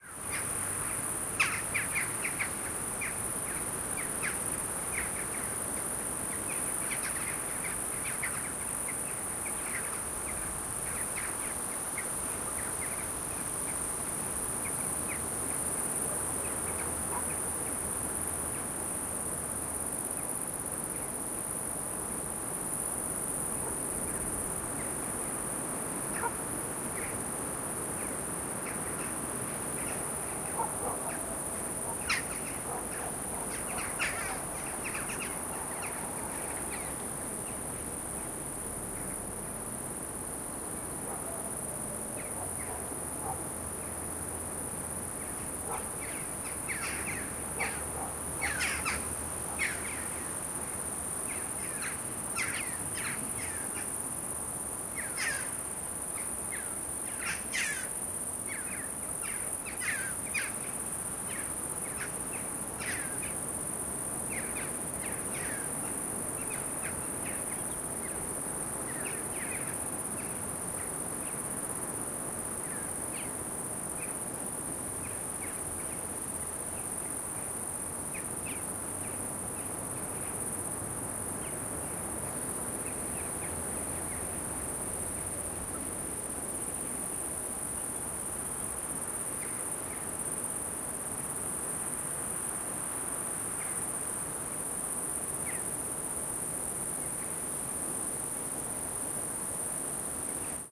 evening ambiance of swifts and crickets. dogs bark sometimes.Background noise of medium "mistral" wind. From various field recordings during a shooting in France, Aubagne near Marseille. We call "Mistral" this typical strong wind blowing in this area. Hot in summer, it's really cold in winter.